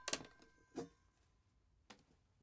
Floor Creak
creak, floor, floors